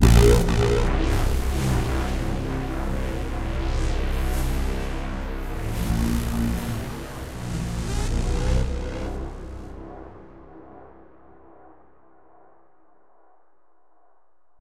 sfx3 Model Pro+Mini C-4
1) VSTi Elektrostudio Model Pro + reverb + flanger + delay + equalizer + exciter
2) VSTi Elektrostudio Model Mini + delay + flanger
effect, Elektrostudio, Mini, Model, Pro, sfx, vsti